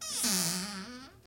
A medium speed opening of a cupboard door.